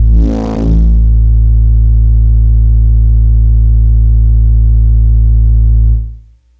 TX81z Home Base A2
This is a raw wave multi-sample created with a Yamaha TX81z FM synthesizer. It is a dirty sort of bass sound which is gritty at the start and gets rounder at the end. The file is looped correctly so it will play in your favorite sampler/sample player. The filename contains which root note it should be assigned to. This is primarily a bass sound with notes from C1 to D2.